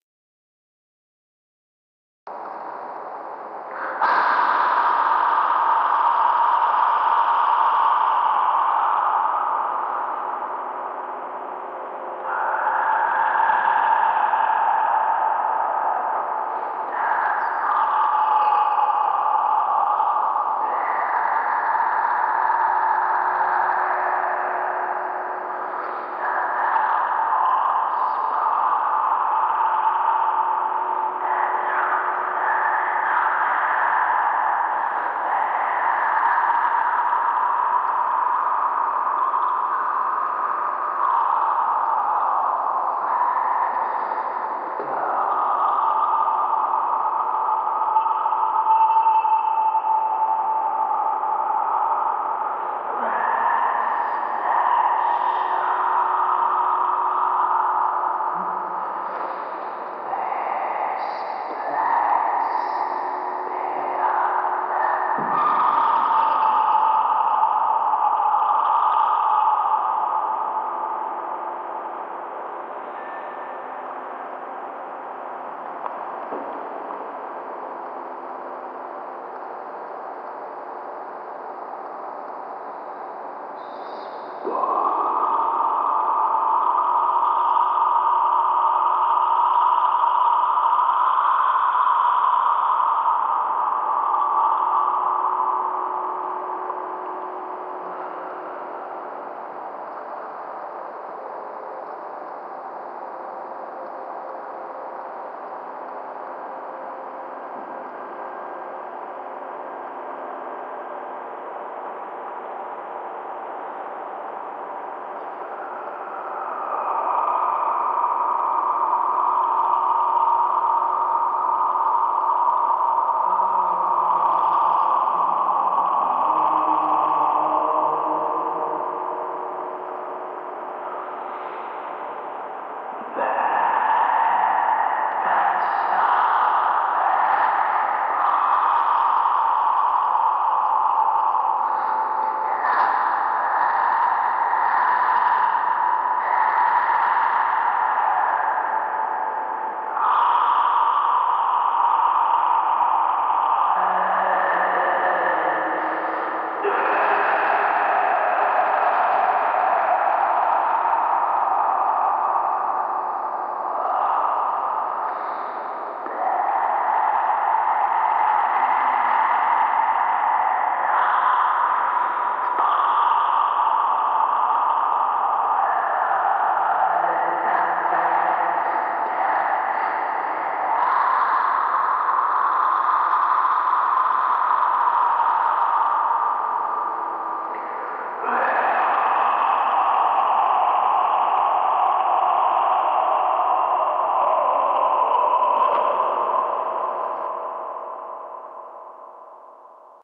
CWD LT lambda voice
A voice layer from "Lambda" bonus track, recorded by Eija Risen.